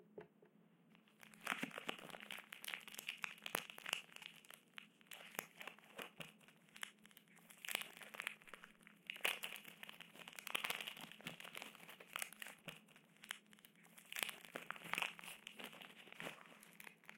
The sound of me opening a Snickers bar remixed to sound like sizzling bacon (proud of this one).